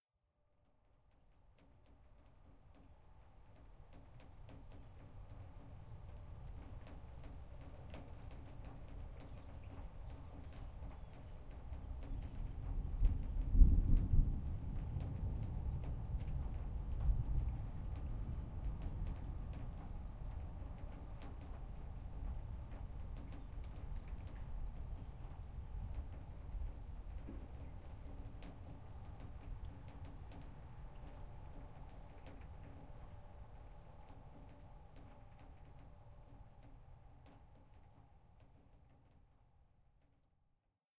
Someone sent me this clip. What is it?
2016-10-06 Mild Thunder
Light rumble of thunder. Recorded with stereo mic on Tascam DR-60.
field-recording, thunder